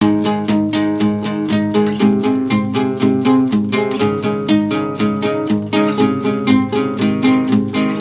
FOG DOG2 Guitar2
A collection of samples/loops intended for personal and commercial music production. For use
All compositions where written and performed by
Chris S. Bacon on Home Sick Recordings. Take things, shake things, make things.
original-music, melody, rock, harmony, Indie-folk, whistle, acoustic-guitar, loop, drum-beat, bass, samples, percussion, free, beat, acapella, voice, guitar, indie, vocal-loops, piano, looping, Folk, drums, sounds, loops, synth